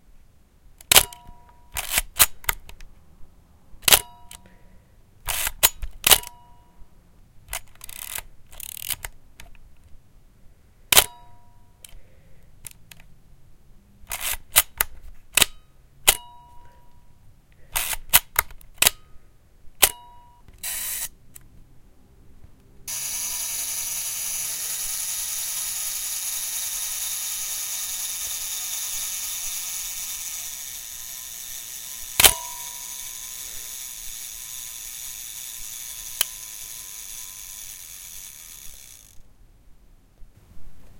Me firing the shutter of a Pentax ME SUPER SLR at different speeds and operating the self timer.